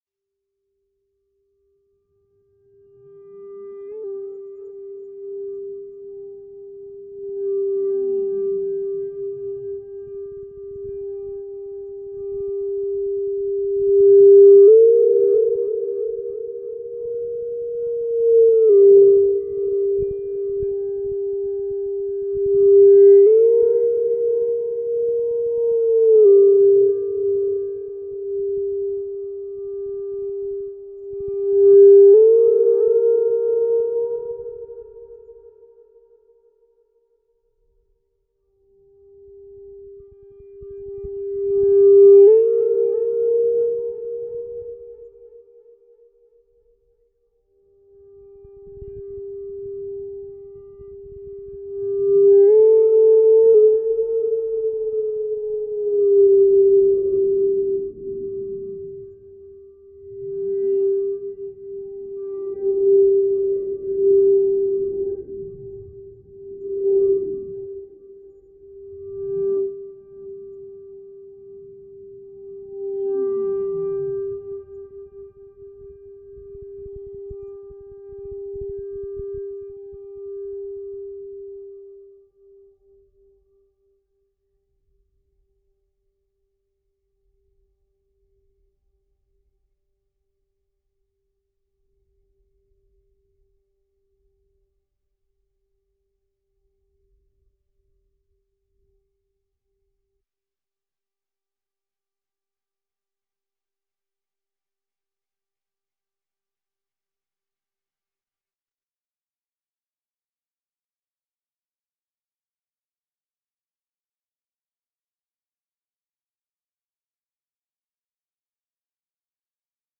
signalsounds for dark scary sound design